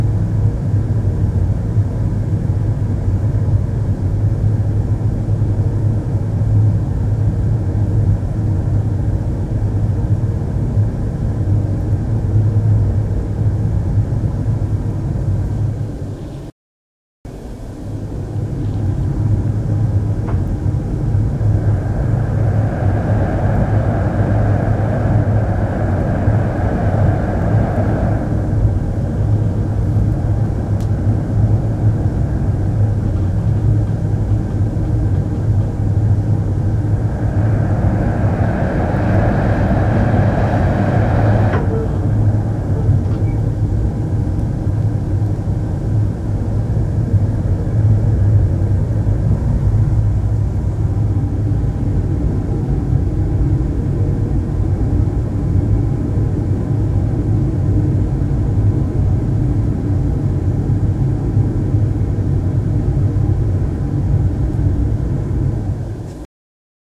Bad recording of a flame in a smithy ventilation system was too loud, but its cool nontheless.